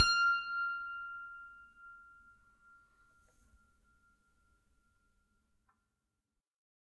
a multisample pack of piano strings played with a finger
fingered, piano, strings, multi